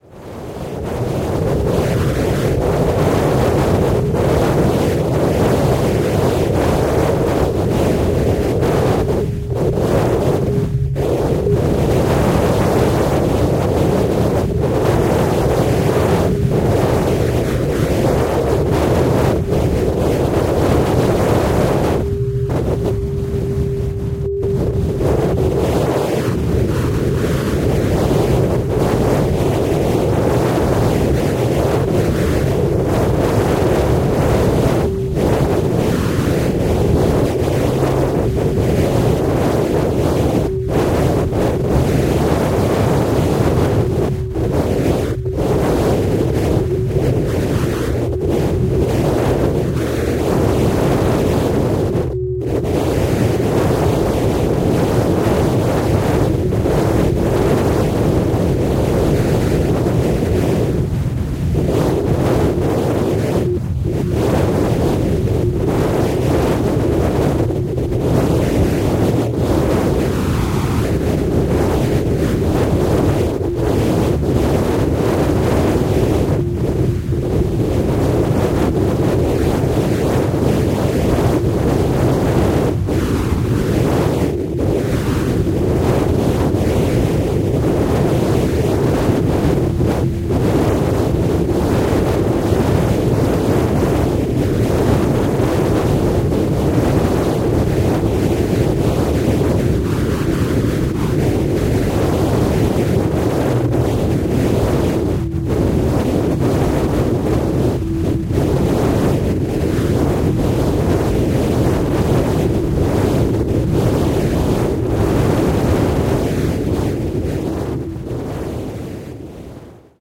This sample is part of the “Wind” sample pack. Created using Reaktor from Native Instruments. This one is quite realistic in my opinion, except the smal interruptions from time to time when a single frequency dominates.
ambient, drone, soundscape, wind, reaktor